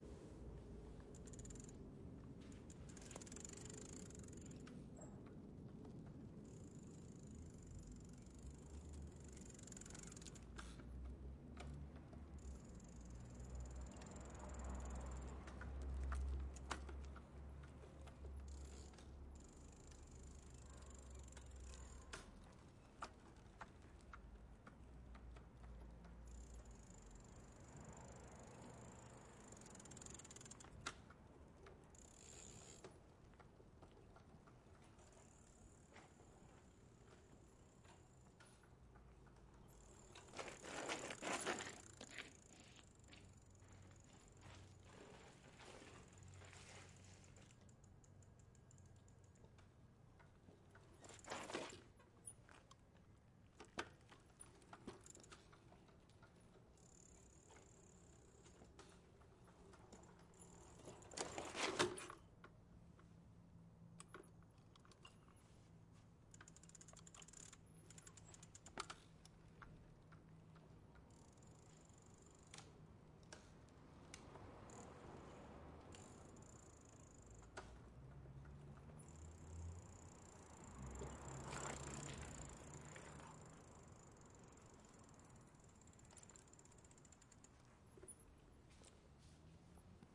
Bicycle Riding Circles, Gravel Stops
Riding a bicycle on a street, Colonia del Valle, México City
Joaco CSP
tierra, stoping, Riding, gravel, Bicycle, bicicleta, Circles, rattle, freno